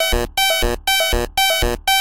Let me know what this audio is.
Synth Techno loop2
Made with Reason 9.5
EDS06s - the sound.
Matrix - processing.
Gate triggering, Volume and Pitch Coarse parameters processed through Matrix patterns.
EDS06s, matrix, randomize, synth